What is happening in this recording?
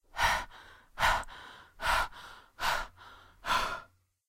Female Breathing Heavily (2)
A female breathes heavily. Could be used for exercising or running.